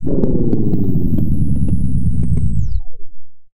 A synthetic vehicle slowing down to stop with backfire.